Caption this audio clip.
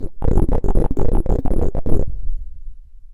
progressive psytrance goa psytrance
psytrance, progressive, goa